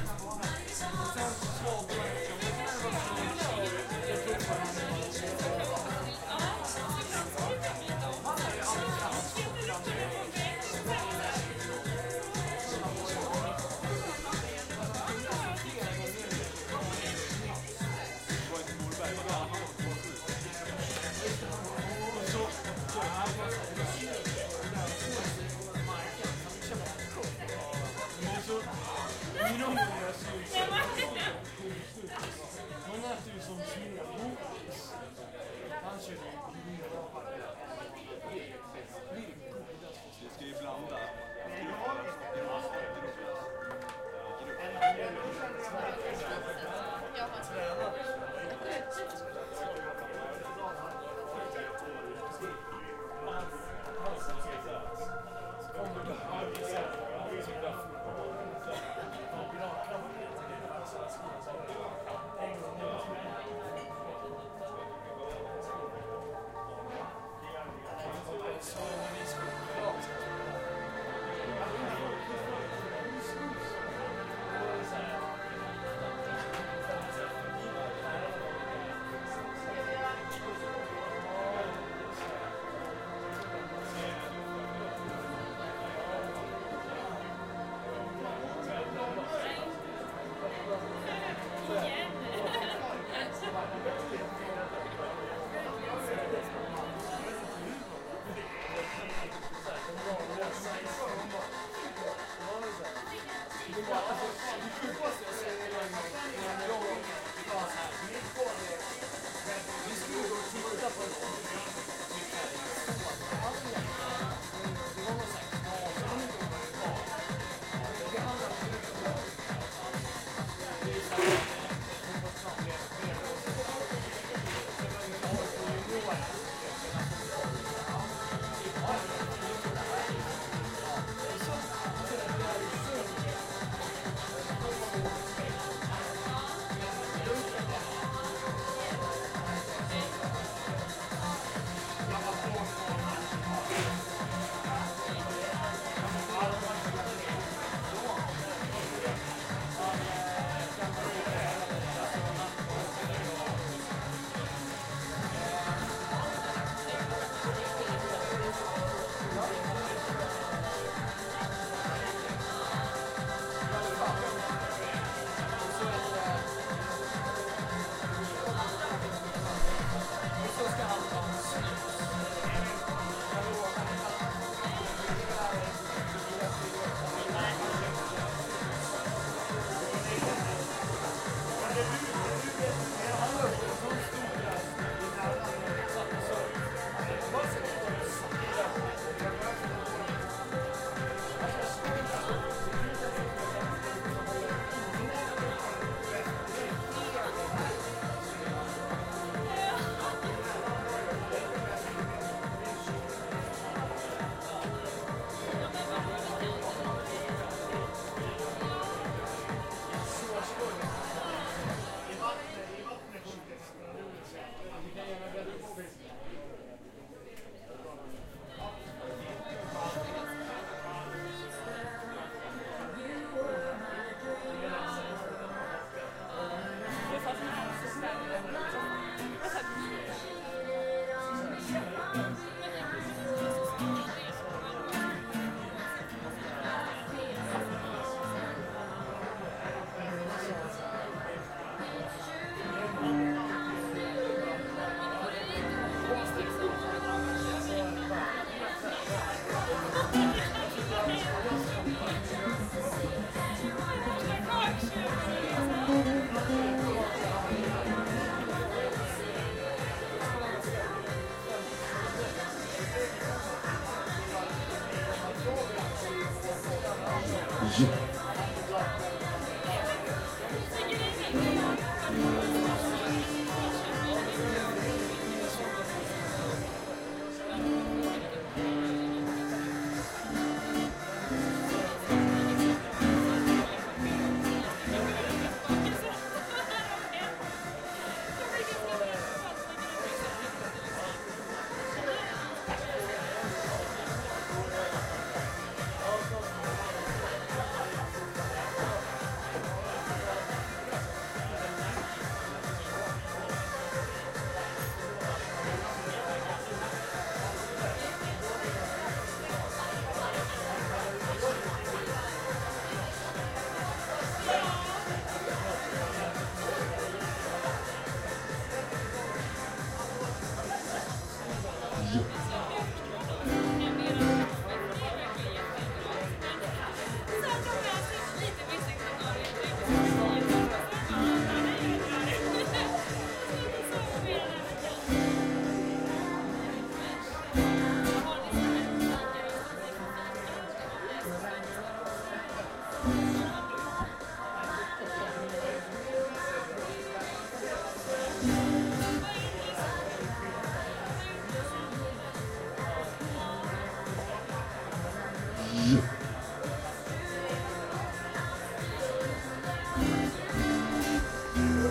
Local bar in sweden with some music and people talking,and after a while alittle soundcheck.